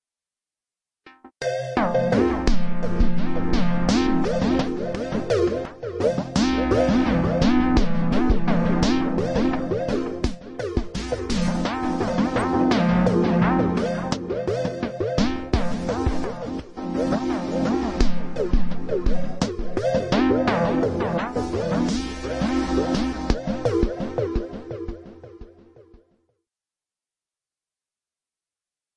The Pitch bender on my synth stopped working right so I decided to make some recordings with the broken wheel. PBM stands for "Pitch Bender Malfunction" and the last number in each title is the BPM for timing purposes. Thanks and enjoy.
Drones, Experimental, Synth